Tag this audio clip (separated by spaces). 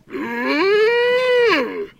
call creature fantasy monster monster-call